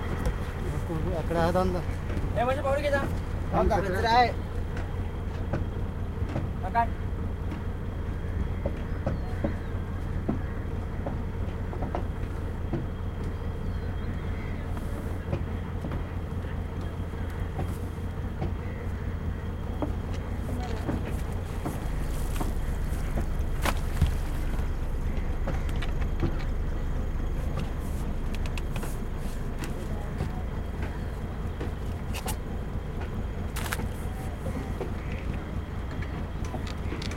fishing boat idling or moving slowly and fishermen talking to each other casting nets India
fishing, or, India, voices, slowly, idling, boat, moving